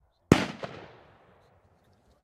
Distant shotgun shot with reverb.